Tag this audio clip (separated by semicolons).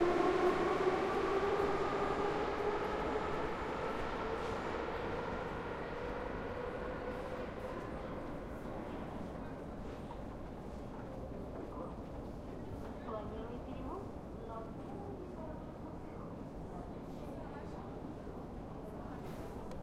train; subway; station; people; metro; ambience